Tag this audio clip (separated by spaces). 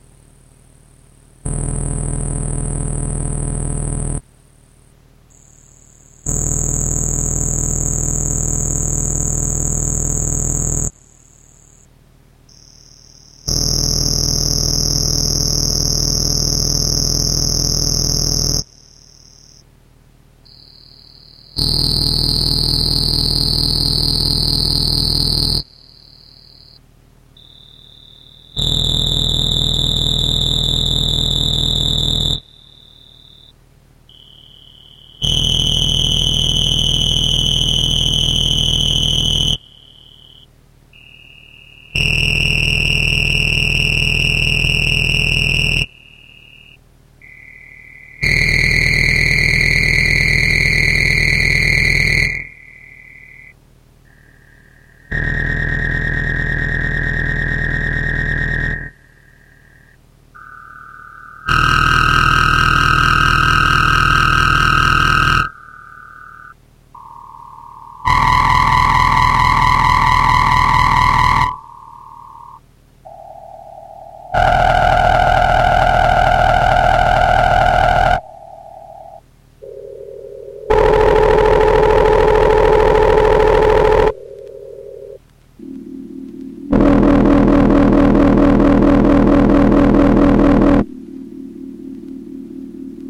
Synthesizer Kulturfabrik